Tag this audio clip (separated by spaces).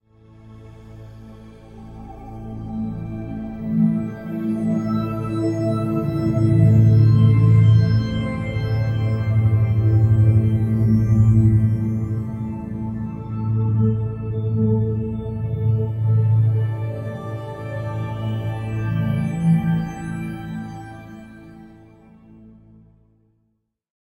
ambient artificial evolving pad soundscape space